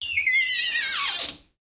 Recording of the hinge of a door in the hallway that can do with some oil.
creak, creaking, door, hinge